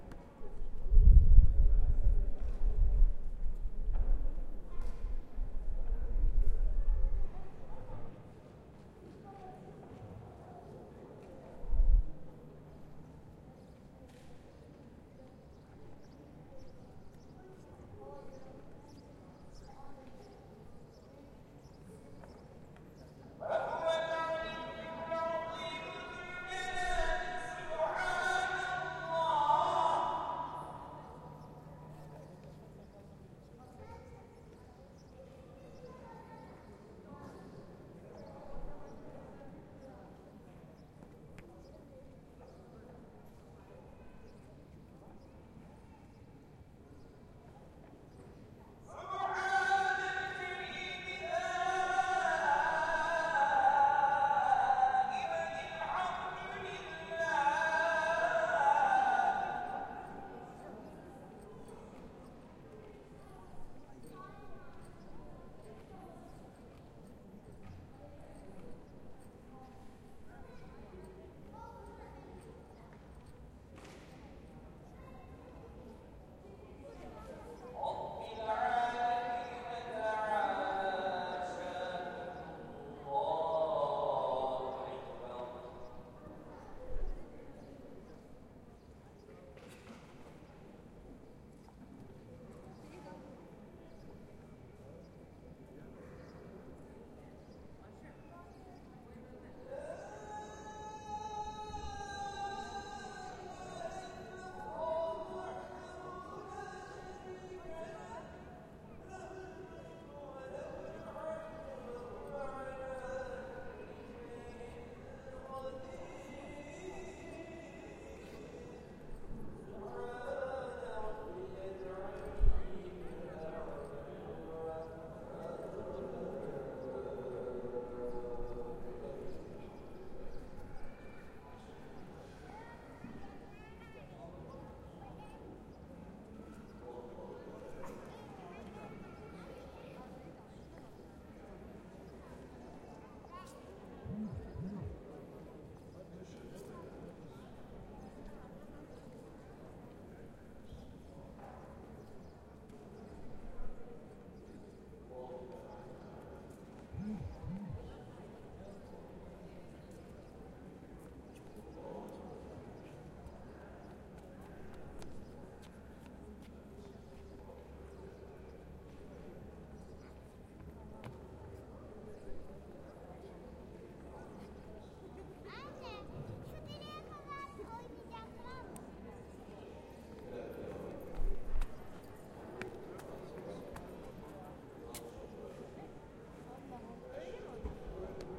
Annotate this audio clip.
Blue Mosque Istanbul with Walla
Field recording recorded inside the courtyard The Sultan Ahmed Mosque, Istanbul